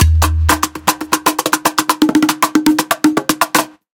Percussion-loop,2 bars, 120bpm. (Ending).
Instruments: Schlagwerk U80 Neck-Udu; Meinl TOPCAJ2WN Slap-Top Cajon; Meinl SH5R Studio Shaker, 16" Floortom with Korino Drumheads.